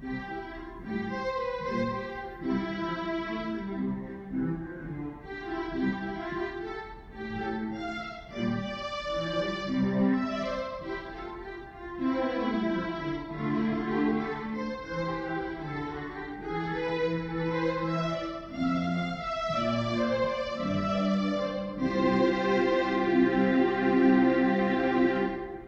This is a keyboard set to play string instruments.